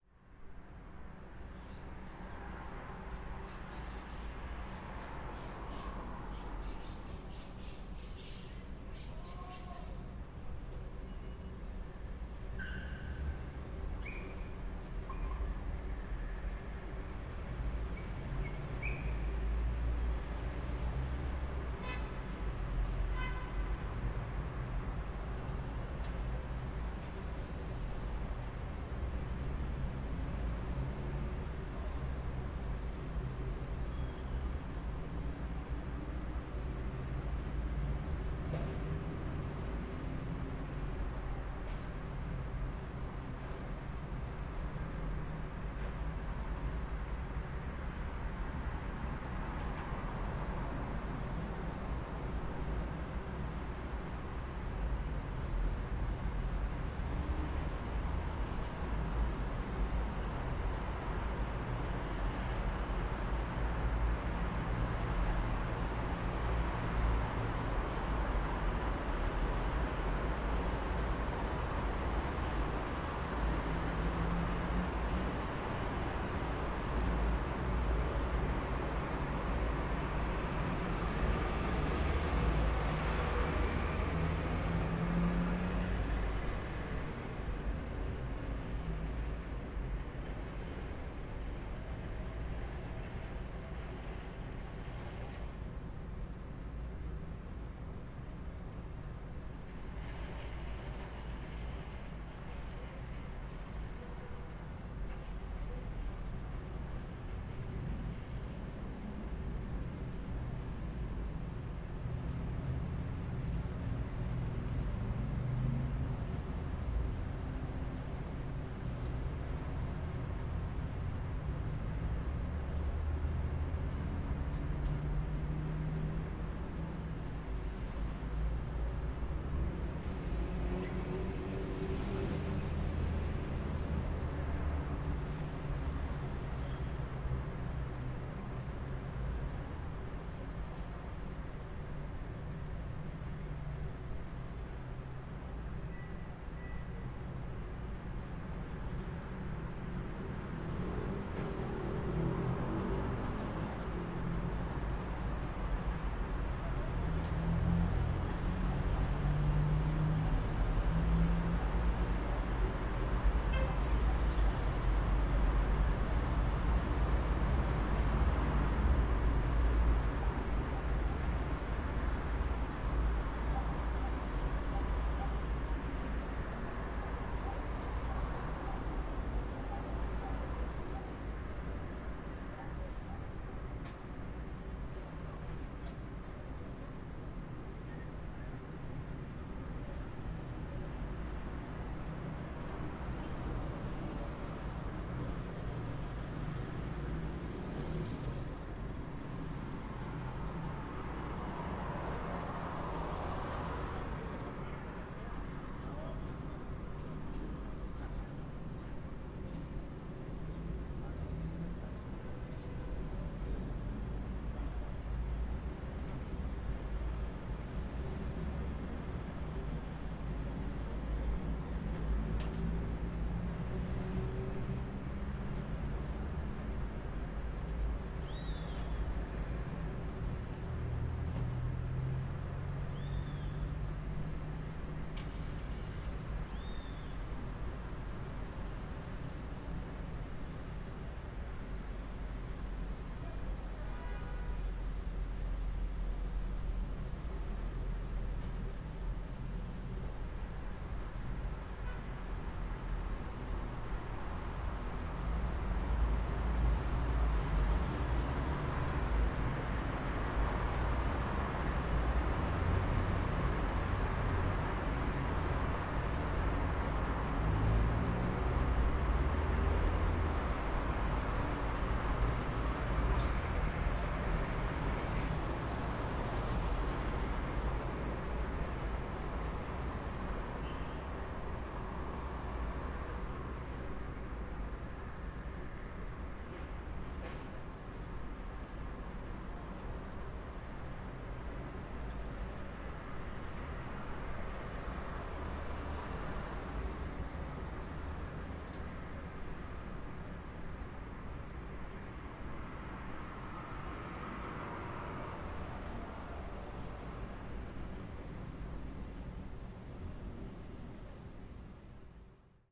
Buenos Aires - Interior room with open window to Med size street - Sunny day - Diaz Velez Ave. and Yatay
Room tone of a room with open windows next to medium size street on a sunny day in Buenos Aires (Capital Federal), Argentina. Recorded with built-in mic on Zoom H4n.
argentina, room-tone, house, indoor, interior, cars, latino, light, buenos-aires, traffic, busy, street, street-sounds, room, sunny, day, spanish, ambience, south-america, open-window, city